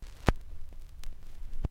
The sound of a stylus hitting the surface of a record, and then fitting into the groove.
analog, noise, record, needle-drop